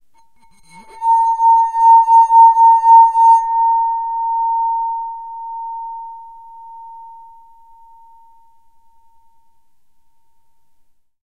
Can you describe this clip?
glass - singing wine glass - empty 02
Sliding a finger around the rim of a wet wine glass, which is empty.
glass, liquid, resonant, resonate, resonating, ring, ringing, sing, singing, slide, tonal, tone, water, wet, wine, wine-glass